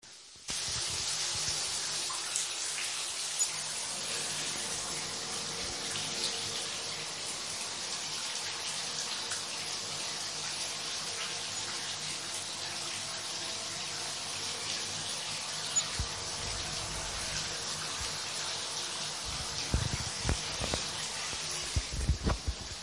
dejar el agua abierta para capturar el sonido del agua
agua, plastico, ducha